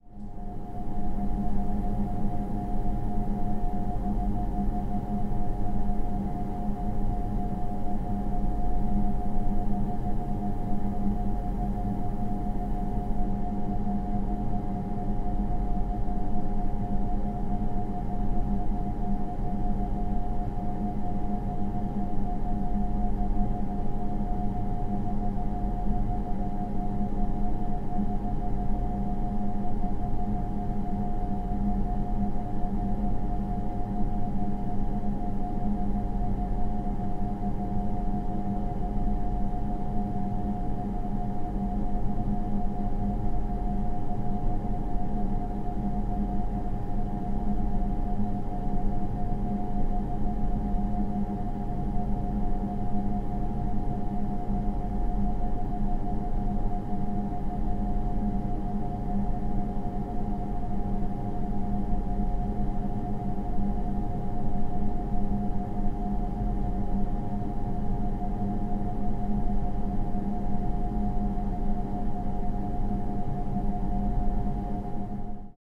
Noisy-Cooler mono
Field recording of a Cooler. Neumann KMi83 omni, Fostex FR2.